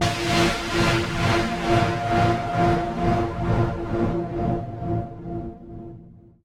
sound of my yamaha CS40M analogue
analogique, fx, sample, sound, synthesiser